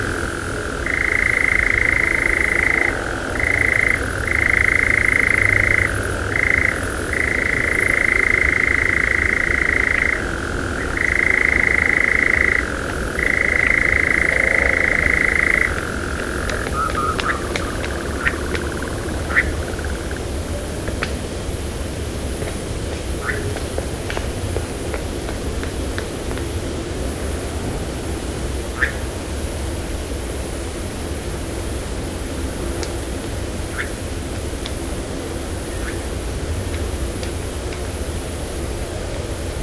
Nightjar LesConches 2001 2
European nightjar, one of the most secretly living and rarely seen european birds. Recorded 2001 in a coastal mixed forest near La Tranche-sur-mèr in Vendée, France. Vivanco EM35, Sony Cassette Recorder WM-D3. Unfortunately of poor quality, has to be filtered.
bird, birdsong, forest, night, field-recording, nature